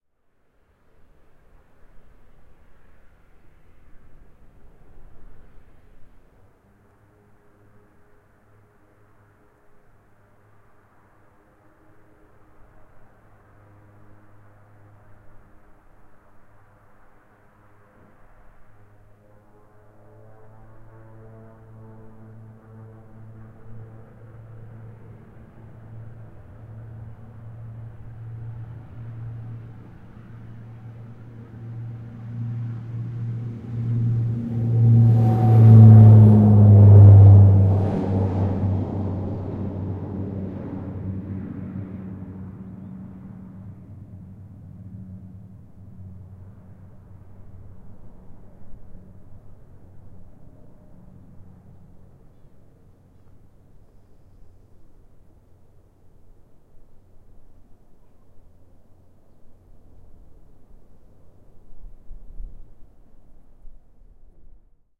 Light Aircraft taking off
A small plane heads down the runway past the mic and takes off.
Recorded with Rode NT4.
cesner, plane, small-plane, take-off